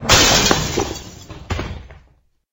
Glass Breaking
A Grass Breaking sound effect , use this with your imagination to create any thing :D , enjoy !
breaking, fx, glass, Glass-Break, sound-effect